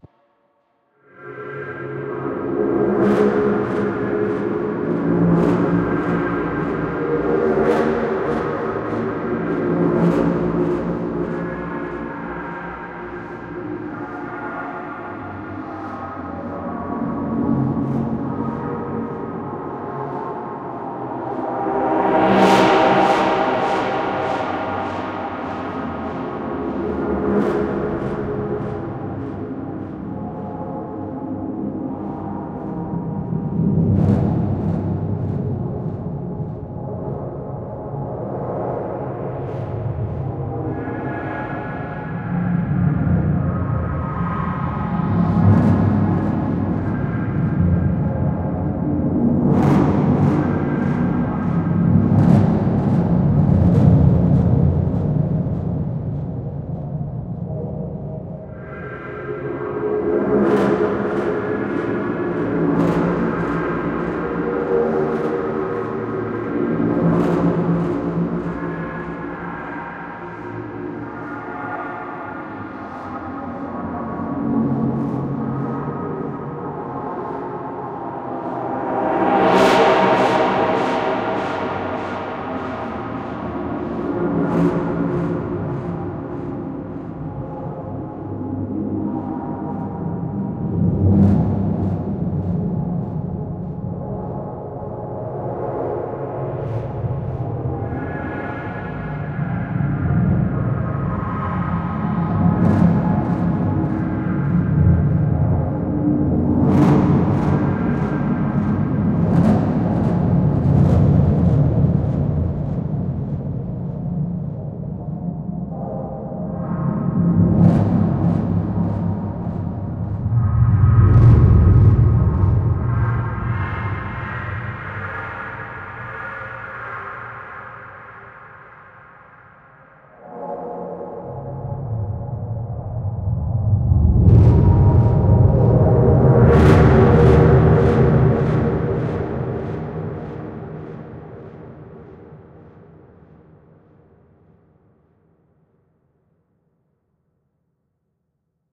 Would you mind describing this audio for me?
Little dark house soundscape
Sheet music based on spooky and dark tones. From these came the ambient sound installation vision.
Music Sheet AI generated: Payne, Christine. "MuseNet." OpenAI, 25 Apr.
and
I rewrote it
SFX conversion Edited: Adobe + FXs + Mastered
Music
Ambiance, Movie, Amb, Ghost, Strange, Night, Sound-Design, Thriller, Sci-f, Wind, Electronic, Sample, Sci-Fi, Drone, Spooky, Atmosphere, Environment, Fantasy, Eerie, Film, Sound, Horror, Dark, Creepy, Cinematic, Scary, Ambience, Ambient